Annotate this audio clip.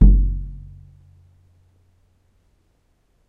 Nagra ARES BB+ & 2 Schoeps CMC 5U 2011.
bass drum hit on the hand
drum, hand, bass